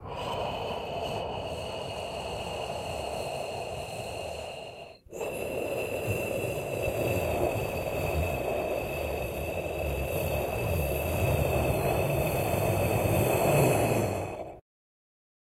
Wind Arctic Storm Breeze-010
Winter is coming and so i created some cold winterbreeze sounds. It's getting cold in here!
Arctic, Windy, Storm, Breeze, Wind